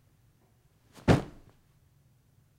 Large duffel bag drop
Here is the glorious loud thump of a full duffel bag being dropped against the ground. Raw audio, unprocessed.